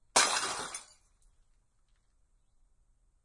Bottle Smash FF182
1 robust, loud, low pitch bottle smash, hammer, liquid
robust-pitch, bottle-breaking, Bottle-smash